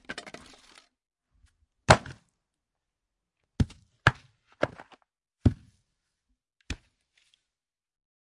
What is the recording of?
throwing logs on dirt
Throwing some small logs on dirt, recorded with a Roland R-26.
throw, twigs, dirt, wood, sticks, logs, ground